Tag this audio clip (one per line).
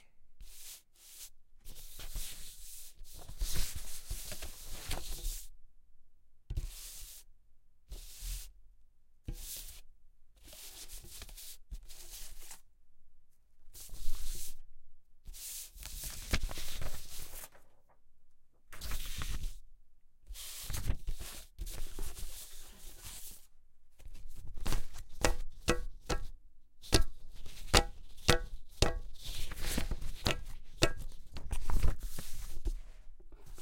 paper
rustle